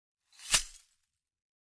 Broken glass contained in a felt cloth. Wrapped up and shaken. Close miked with Rode NT-5s in X-Y configuration. Trimmed, DC removed, and normalized to -6 dB.
broken, glass, shake